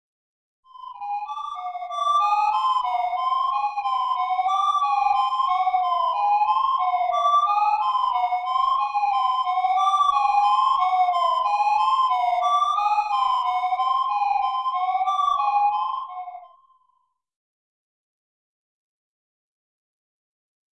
h-b eerie space
eerie, h-b, space